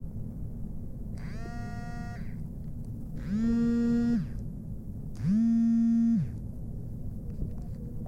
Cell Phone Vibrate.R
Cell phone vibrating, various
Cell-phone, sound-effect, vibrate